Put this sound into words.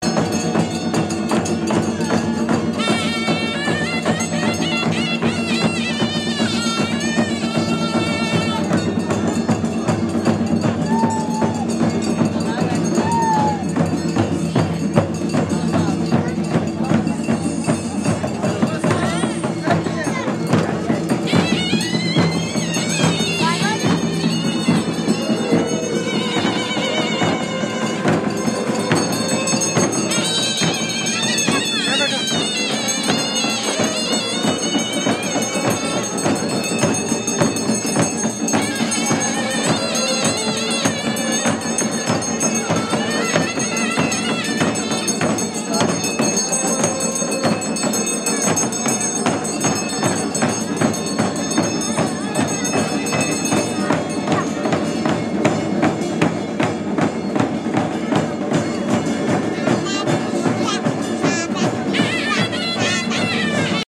india ceremony in vashist
Sounds of a ceremony in the middle of Vashist, India with Indian music, (traditional instrument sounds a bit like a clarinet), drums and bells. Enthusiastic crowd ambience / noise.
traditional, pradesh, drums, bells, indian, sounds, ceremony, music, himachal, vashist, India